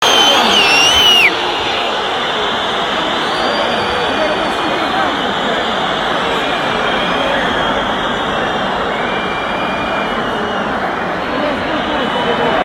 The sound of whistles during a football match in a stadium.